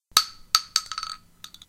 An expended 12-gauge shotgun shell hitting a ceramic tile floor.
shotgun, ground, shell, empty, casing, impact, ejection